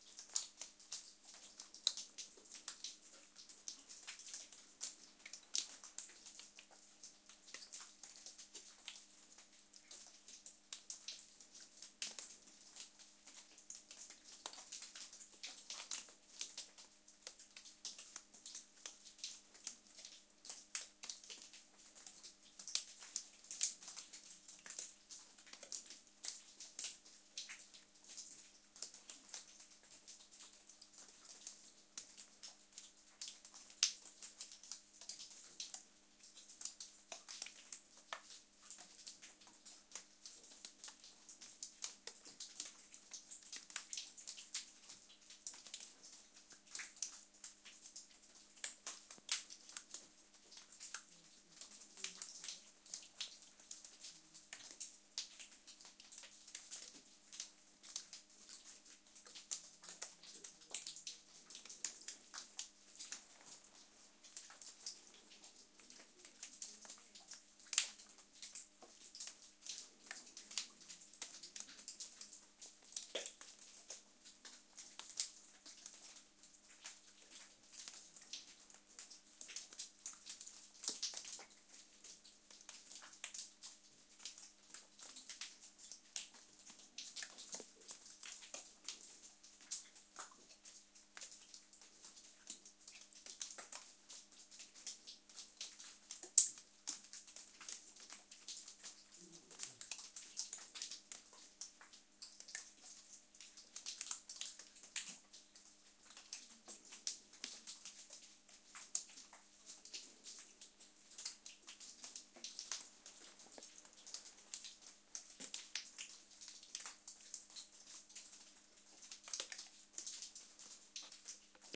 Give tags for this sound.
claustrophobia dripping nature cave water rain drip melting field-recording drops

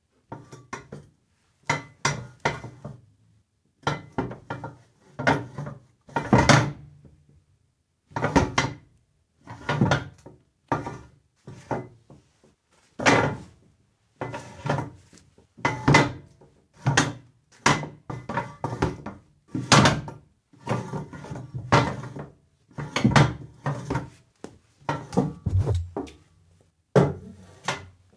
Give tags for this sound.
chair metal silla

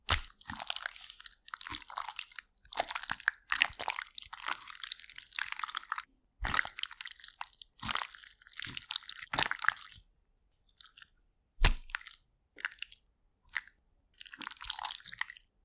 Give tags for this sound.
gore body blood hit squish

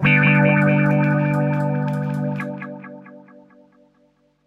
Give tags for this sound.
Jungle HiM reggae onedrop DuB rasta roots

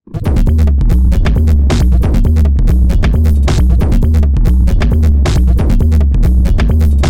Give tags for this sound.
bassline funky loop techno

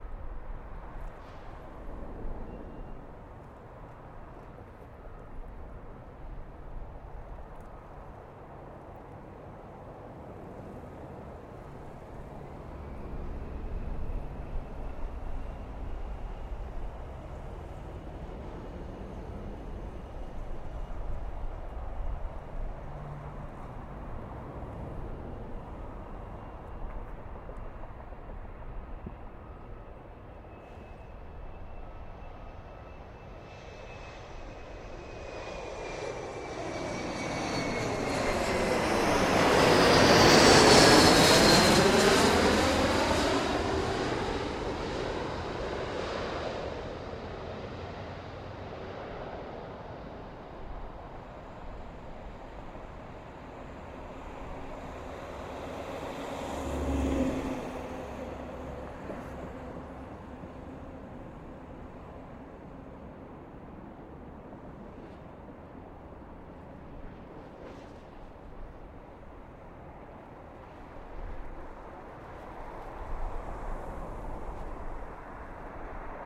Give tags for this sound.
aeroplane airbus aircraft airplane airport angeles aviation boeing engine engines flight fly-by jet jet-engine landing launch los plane runway take-off takeoff

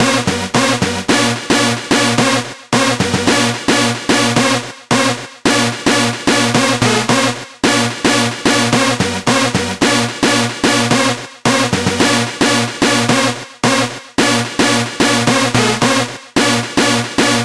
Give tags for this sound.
dance synth

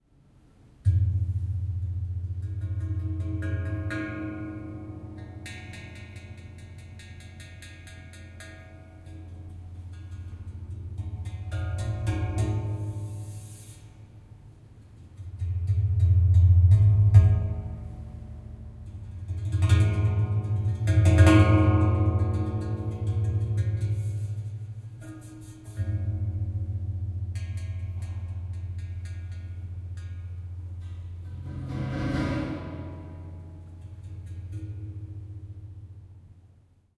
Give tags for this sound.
campus-upf corridor handrail stairs tapping UPF-CS14 wiping

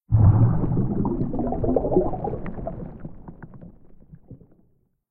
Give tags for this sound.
bubbles sea under-water

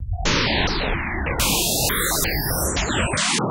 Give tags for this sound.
additive digital noise synth synthesis synthesizer synthetic weird

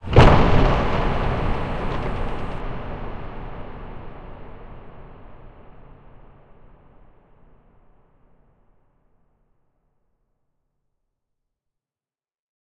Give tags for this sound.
sounddesign sci-fi alien impact weapon game effect explosion sfx sound future fx

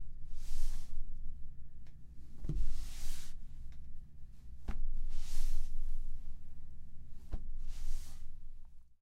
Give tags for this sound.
scrub toy textile cloth felt random filling fabric stuffedtoy tissue

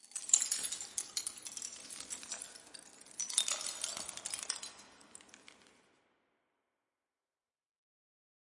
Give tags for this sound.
crackle drop eggshell crush ice splinter crunch

